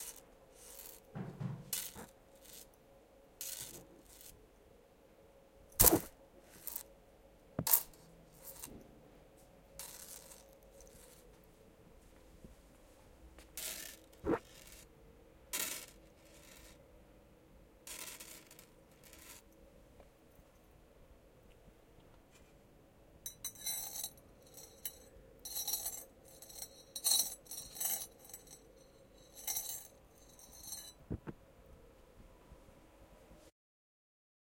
I repeatedly dropped a small chain on different surfaces.